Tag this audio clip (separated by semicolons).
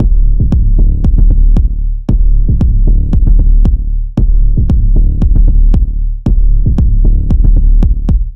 electronic; sub; kick; loops; dance; 808; trance; beat; drum-loop; 115bpm; loop; bpm; techno; breakbeat; drum; electro; drums; hard; floor; bass; breakbeats; break; club; 115; beats; percussion-loop